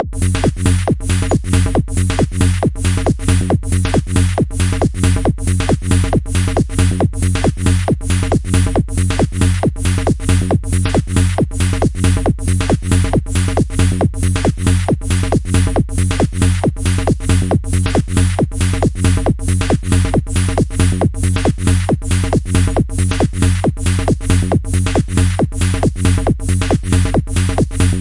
swing beat 52 by kris demo beat loop
ultra-hardcore, rave, 137, 05bpm, hardcore, club, dance, techno, hard-techno, swing-beat